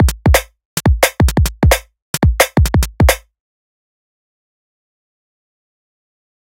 semiQ dnb dr 015
This is part of a dnb drums mini pack all drums have been processed and will suite different syles of this genre.
bass, beat, break, breakbeat, dance, dnb, drum, drum-loop, drums, jungle, loop